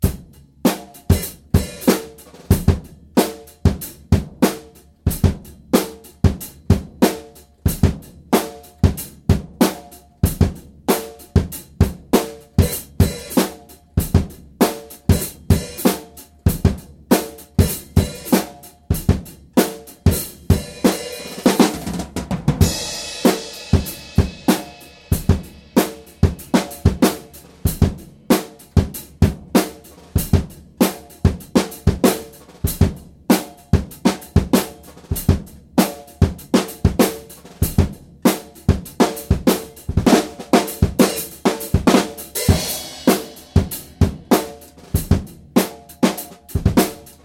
Drums Funk Groove 3
Funky, groovy drum beat I recorded at home.
Recorded with Presonus Firebox & Samson C01.